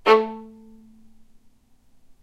violin spiccato A2
spiccato, violin